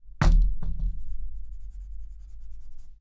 knock effect
a sound of knocking something